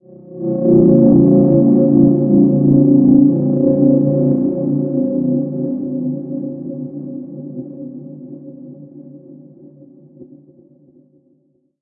Samurai Jugular - 13
A samurai at your jugular! Weird sound effects I made that you can have, too.
sci-fi, sfx, high-pitched, trippy, dilation, time, sound, effect, sweetener, experimental, spacey